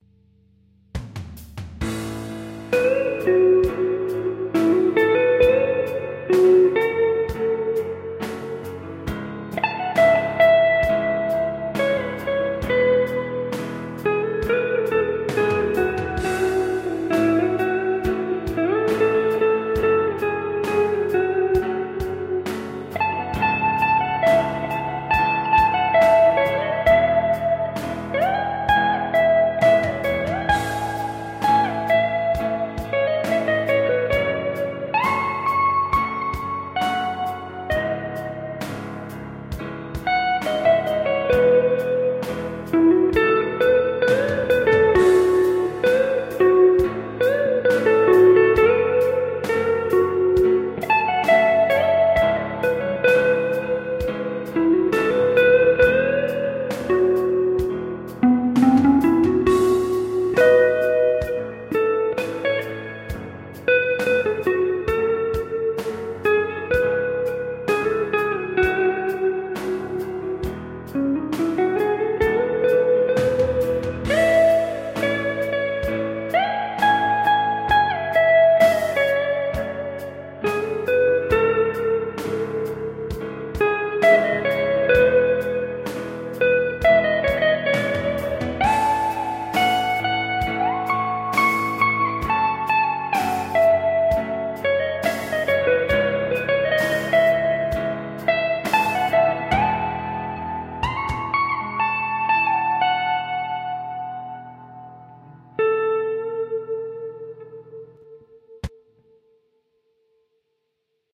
Instrumental composition - piano, bass, solo guitar, drums.
acoustic, atmospheric, guitar, instrumental, melodic, synthesizer